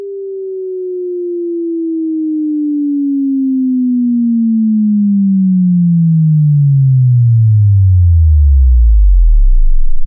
Bass Sine Sweep 400-10Hz
A Sine Wave Sweep from 400Hz down to 10Hz made using Audacity
Originally made to test out my cars subwoofer
audacity, bass, bass-test, end, low, low-end, modulation, sine, sine-wave, sub-bass, subwoofer-test, sweep, synth, wave